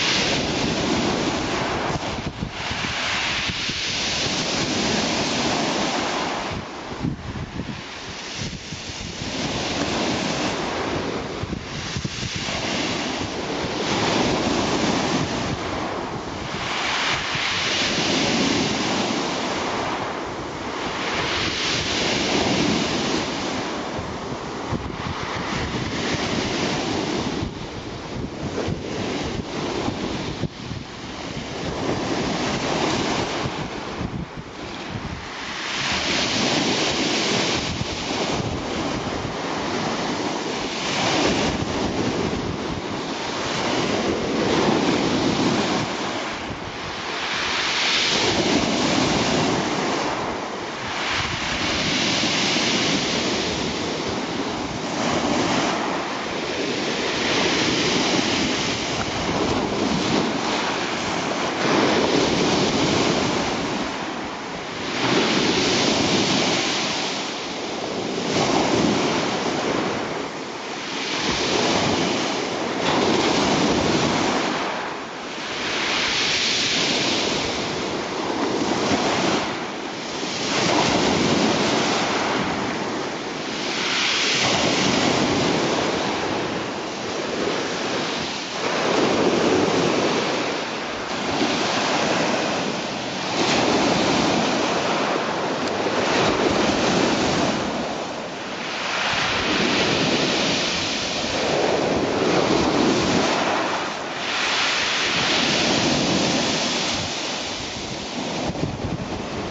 waves retreating on peables effect -P1060804
Waves breaking over pebbles on beach near Maro, Spain
Waves, pebbles, beach